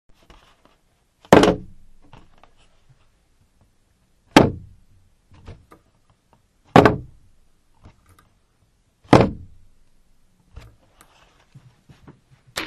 Cardboard jigsaw puzzle box lid being dropped on a table